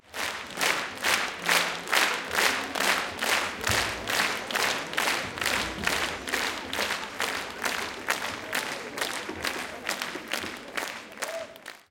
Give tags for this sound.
applaud applauding applause audience auditorium group hand-clapping Holophone theatre

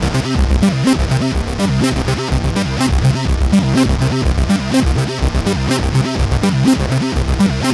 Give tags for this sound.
dry loop

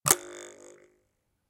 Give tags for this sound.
click blade blades-sound knife field-recording vibration shot glitch high sound percussion recording

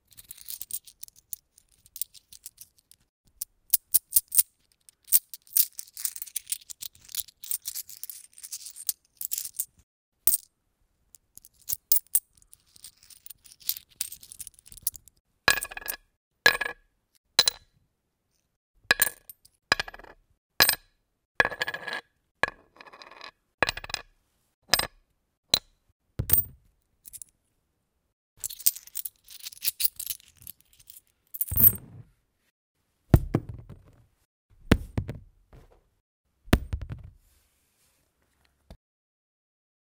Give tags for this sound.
close-up coins drop impact metal metalico metallic monedas

